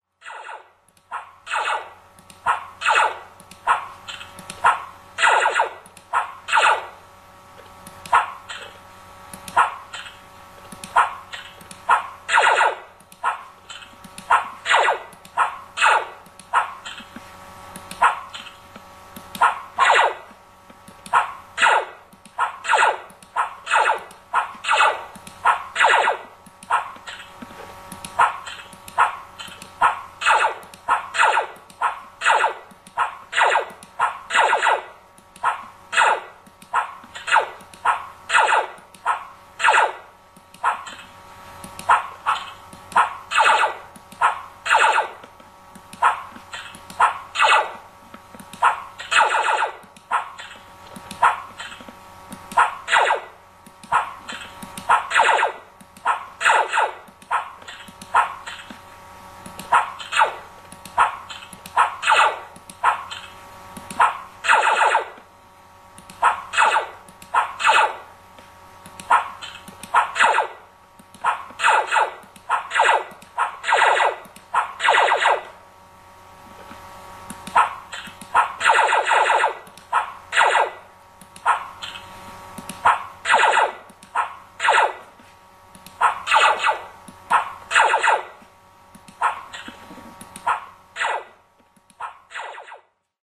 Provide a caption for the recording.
bubble shooter 161010
16.10.10: the bubble shooter sound. my office. during of the PhD defence preparations.
Poznan, Poland
game, shhoting, internet, home, computer